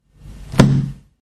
Closing a 64 years old book, hard covered and filled with a very thin kind of paper.
lofi, book, household, percussive, loop, paper, noise